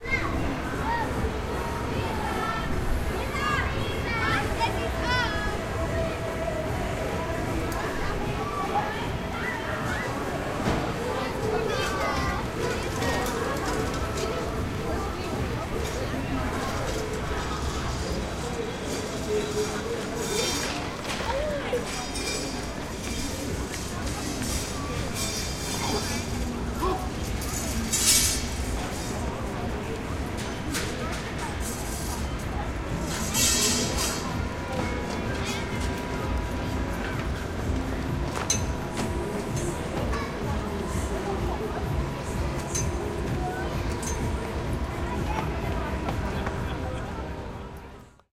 Recordings from "Prater" in vienna.